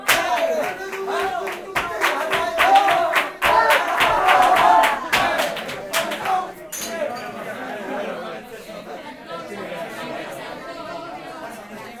improvised flamenco party ('juerga') in Seville, Spain. Edirol R09 internal mics
dancing, field-recording, flamenco, hand-clapping, party, rhythm, spanish, spring